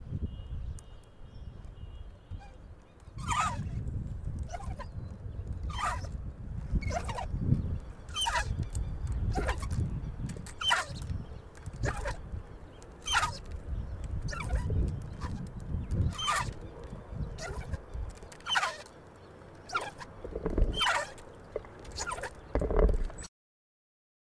Swing Sound
Swinging on a park swing in the outdoors.
Outdoors, Park-swing, Squeak, Swing